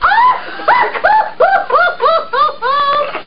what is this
laugh recorded for multimedia project